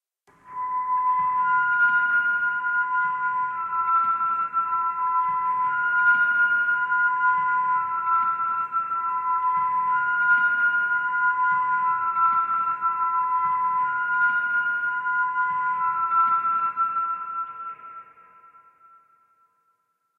horror effect5
made with vst instruments
spooky, trailer, dramatic, movie, drone, cinematic, sci-fi, scary, mood, suspense, horror, background, hollywood, film, drama, thrill, music, thriller, dark, background-sound, deep